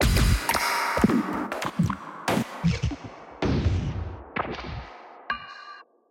glicz 0002 1-Audio-Bunt 2
breakcore, bunt, digital, drill, electronic, glitch, harsh, lesson, lo-fi, noise, NoizDumpster, rekombinacje, square-wave, synthesized, synth-percussion, tracker